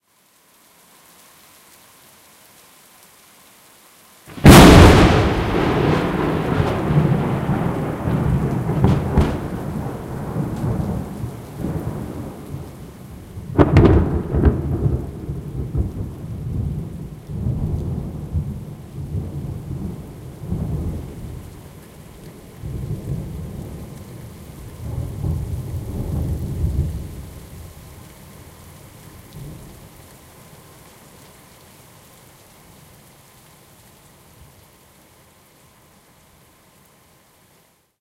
Lightning hitting a tree from about 200 meters away. Recorded with a Zoom H2n in front of an open window.
rain, Thunder, weather